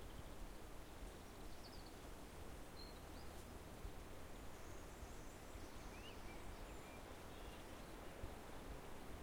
birds near a river
h4n X/Y